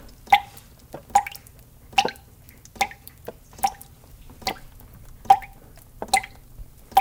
Water Driping 3
Shower Water Running Drip Toilet
toilet, drip, water